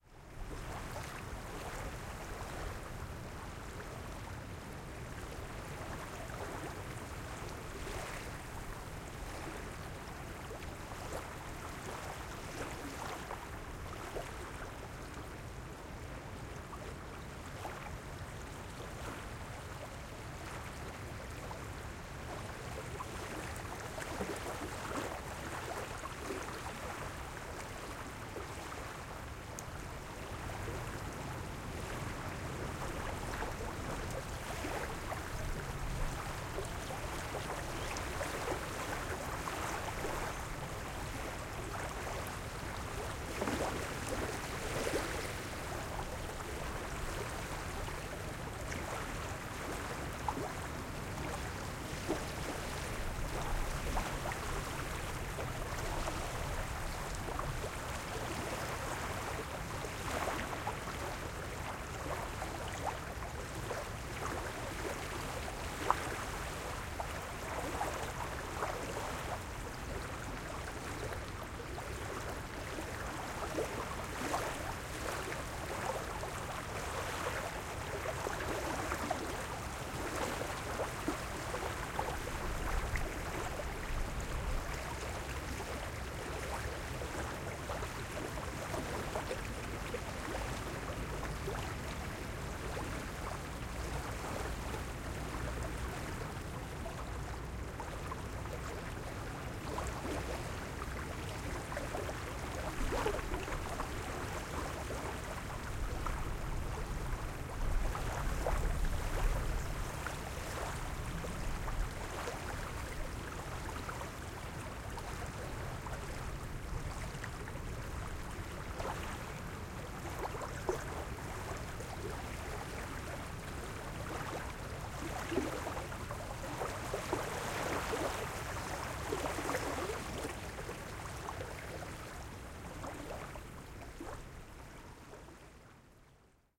small and fast wavelet because of the wind on a lake, close up.
France, 2005
recorded with ABORTF set up of two Octava MC12
recorded on Fostex Fr2